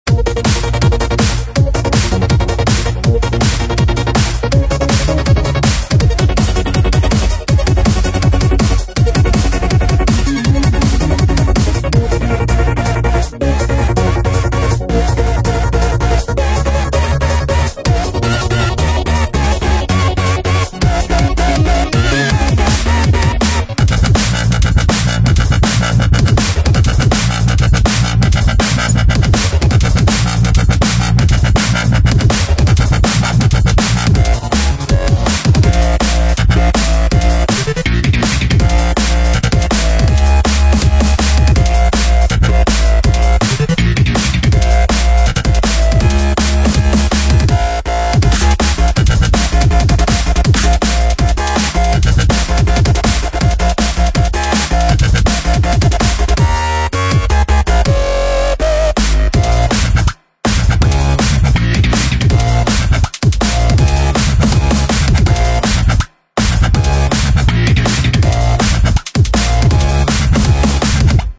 Runner Loop
comppression, club, limters, synths, beat, drums, electronic, clip, fruity-loops, fx, flanger, eq, practise, sample, loop, bass, house, trance, mastering, dubstep, free, reverb, delay, 2013